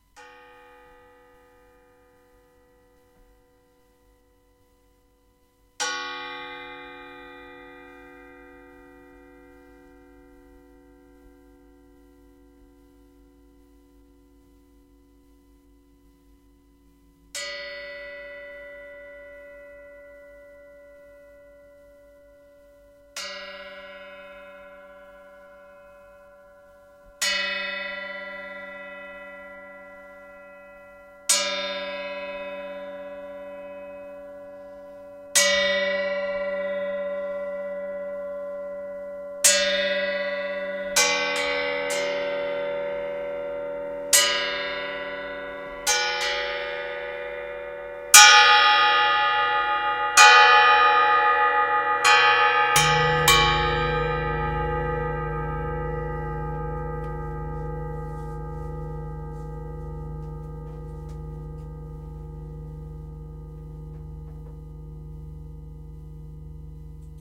Alright, some Think nonono ! not bells again. Still there are people, who cannot get enough of the jingle, or thundering like from heaven. My bells are not bells...they are a Little secret. Enjoy.